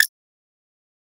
menu move6
UI, click, terminal, game, menu, interface, application, command, space-ship, computer, futuristic